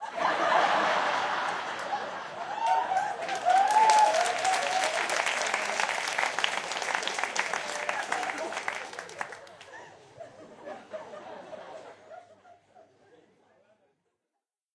LaughLaugh with applause in medium theatreRecorded with MD and Sony mic, above the people
auditorium, audience, crowd, prague, laugh, czech, theatre